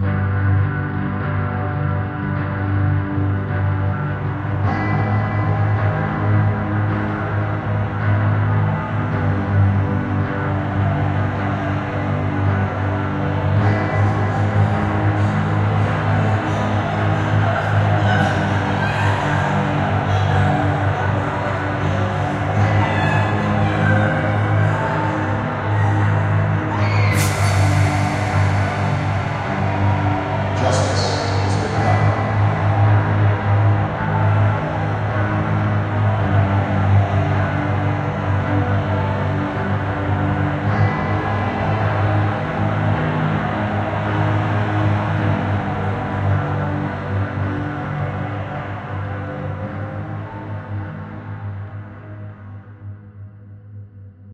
Bunch of samples and guitars